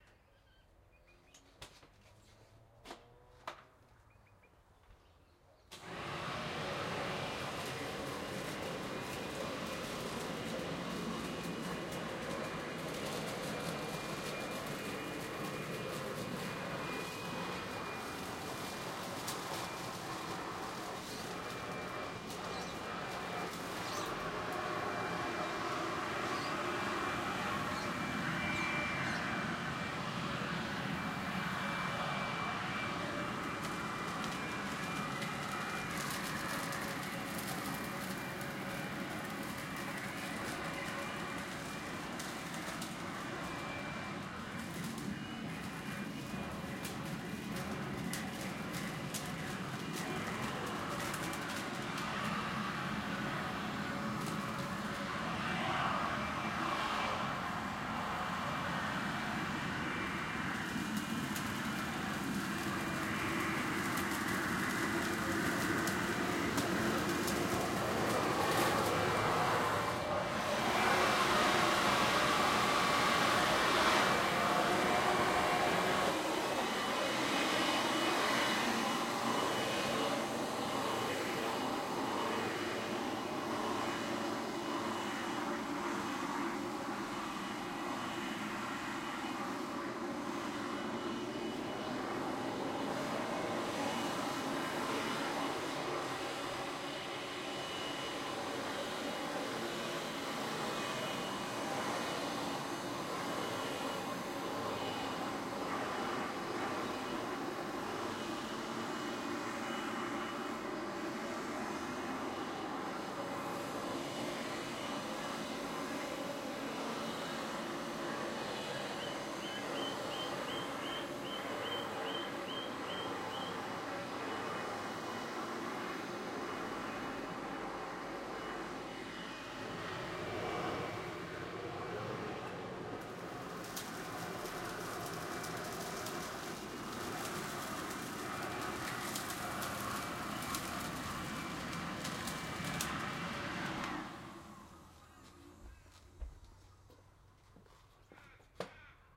BlowerVac Lawncare
This is a Ryobi blower-vac being used to vacuum leaves from around the edges of a lawn where they had collected. Then it is used to blow leaves off a driveway. Recording chain: Rode NT4 (stereo mic, in Rode blimp) - Edirol R44 (digital recorder).